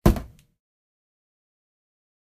Varied hits on materials in my basement - cardboard boxes, a treadmill, wooden table, etc...most of the sounds from this pack were extracted from a recording of me striking said objects with my palm.
Because of proximity effect, I found some of these to be useful for the sound of an object hitting the ground.